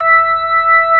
real organ slow rotary
tonewheel, b3